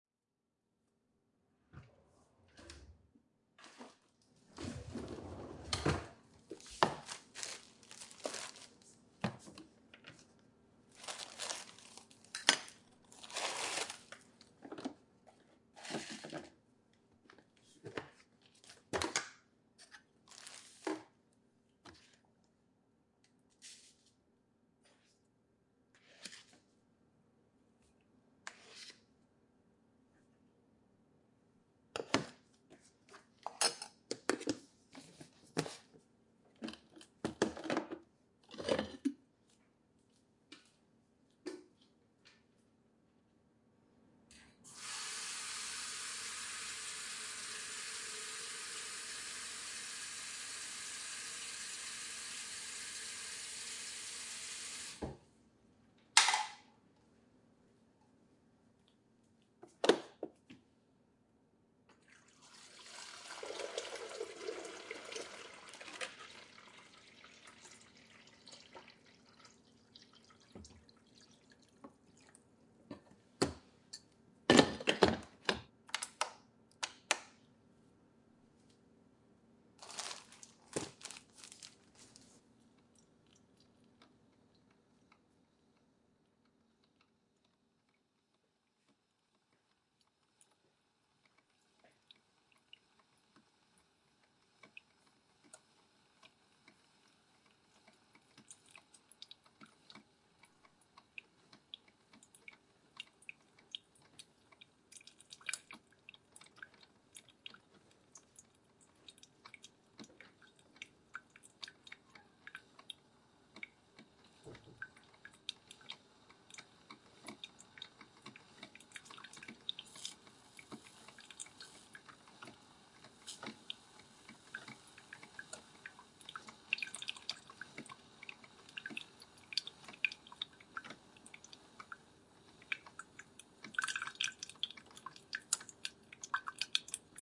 Making Coffee
Measure grounds, pour water, and brew. Some cabinet rustling.
coffee, coffee-machine, brewing